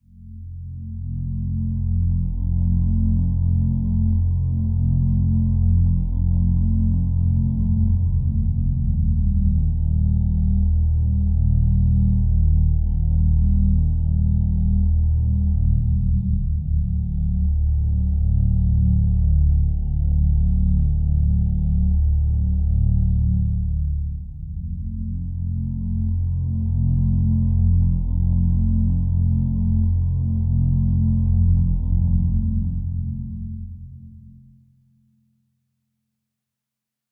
This is simple bass drone pad. It is dark and deep, very good for horror creations.
It will be nice if you will comment here to show what you have created with it.
I created this one on my custom build synth setup and used in one of my tracks (alias Arkham Radio).
scary, sinister, fear, Drone, halloween, horror, evil, bass, Ambient, creepy